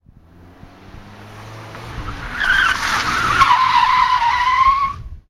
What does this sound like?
This is a monaural recording of a Saturn SL1 drifting in a parking lot.